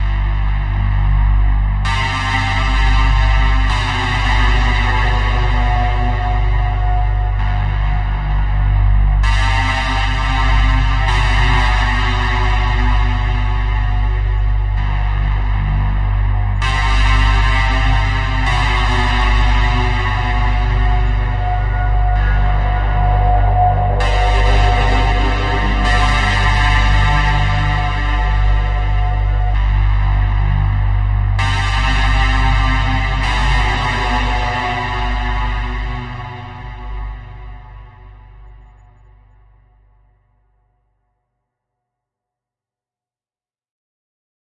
This is a dark hopeful ambience sound effect with a very big and heroic vibe -
created using the Toxic Biohazard plugin in FL Studio 12. Can be used for all sorts of scenarios in games and movies.
drama, ambiance, effect, theme, electro, suspense, atmosphere, synth, pas, film, music, ambience, sci-fi, drone, soundscape, electronic, bass, deep, cinematic, big, sound, end, movie, dark, hopeful, ending, heroic, trance